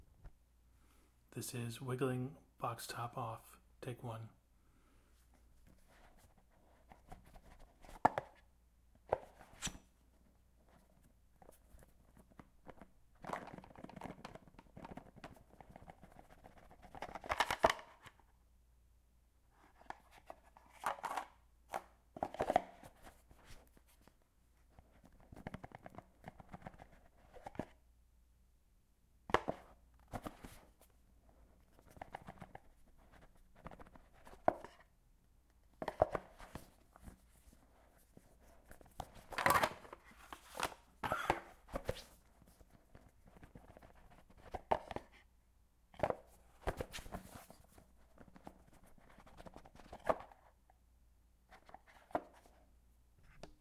FOLEY Small box wiggling top off 1
What It Is:
Me wiggling an iPhone box.
A young girl handling a birthday gift box.
AudioDramaHub, birthday, box, cardboard, foley, gift, present